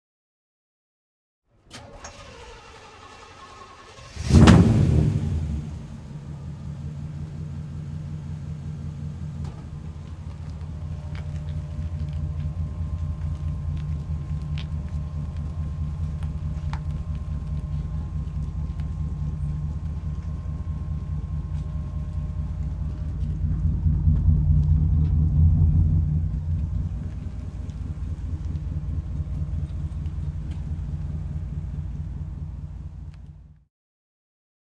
1950 Ford Mercury ignition and slow cruise
Recorded on Zoom H4N with Rode NTG-3.
The sound a vintage 1950 Ford Mercury car with v8 engine starting up and cruising slowly recorded from outside.
ford, start